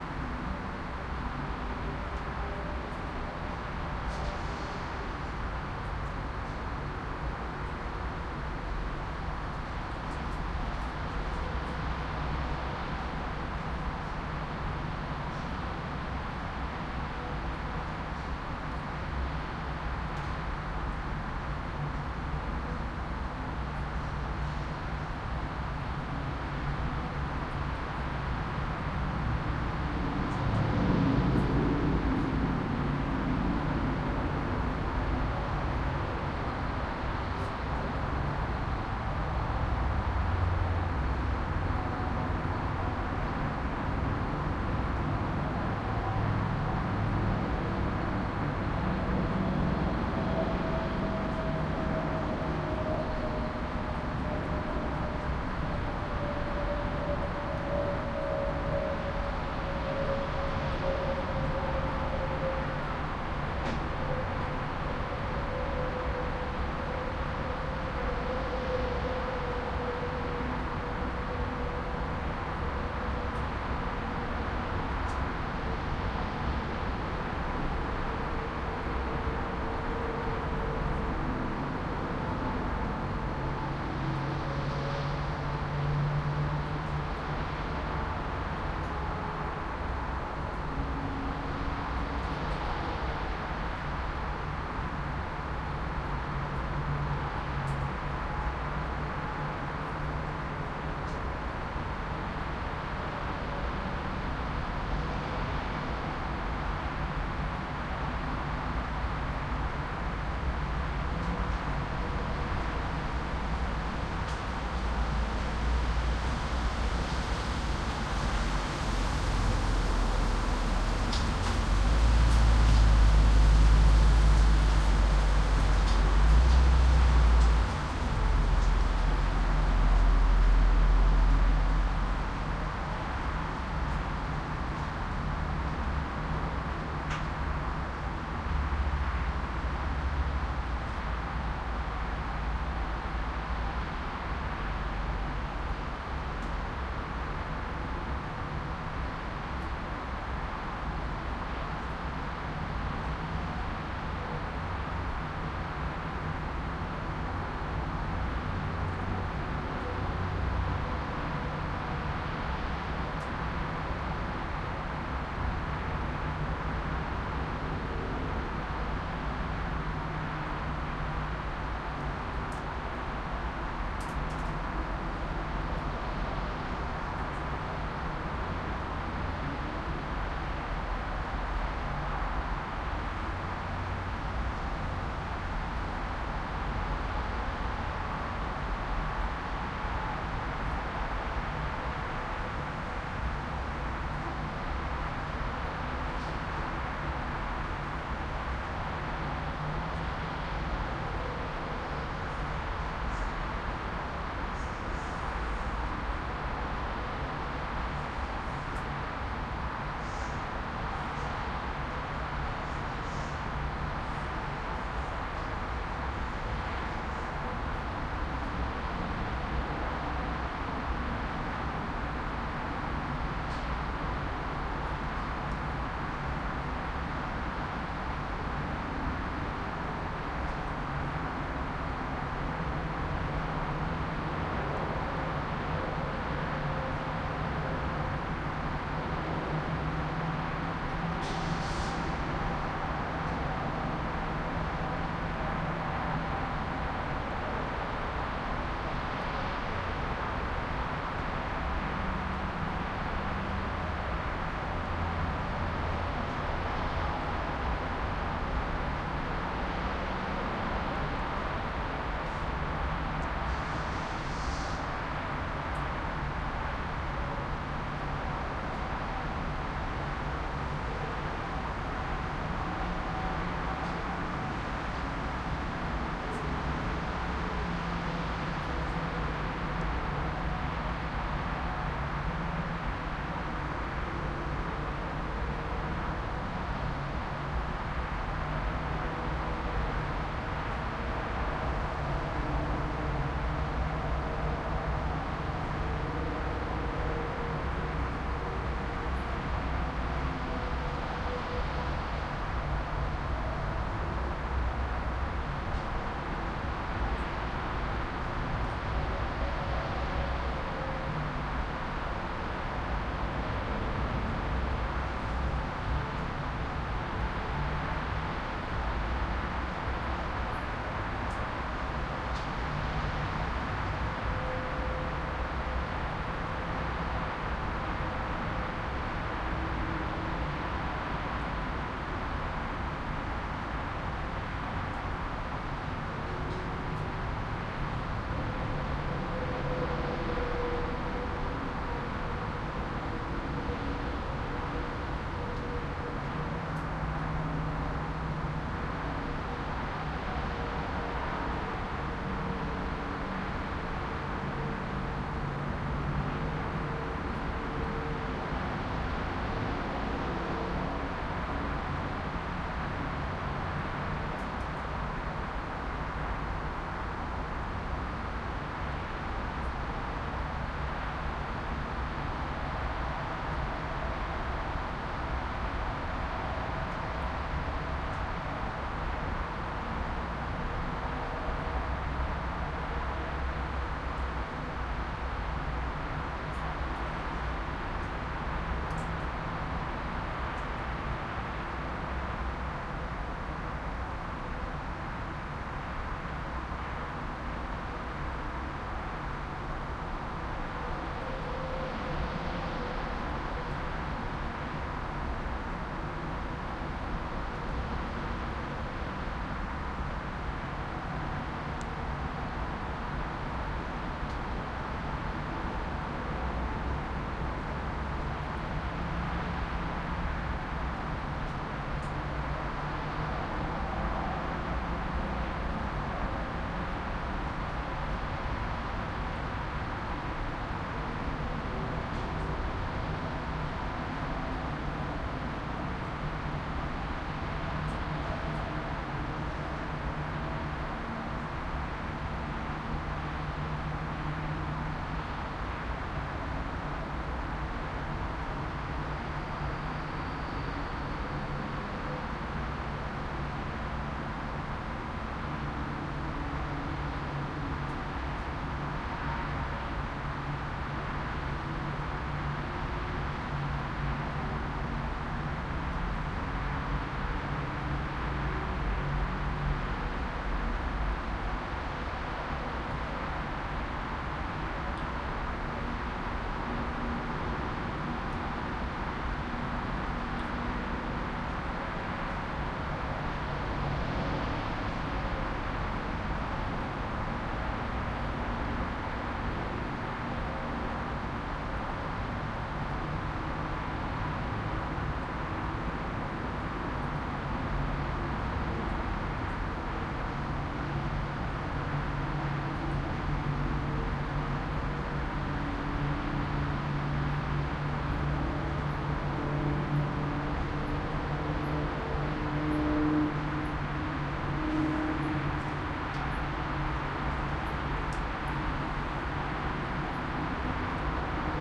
Binaural ambience outdoor alley patio next to highway
8.5 minutes of sitting on a patio next to busy I-35 at night. 'Binaural'-esque rig using micbooster clippy lavs stuck into silicone ears into a Zoom H6.
primo, street, field-recording, micbooster, cars, road, street-noise, alley, interstate, binaural, turnpike, city-noise, overpass, noise, ambience, wind, em272, stereo, urban, patio, highway, traffic, city, clippy, outdoor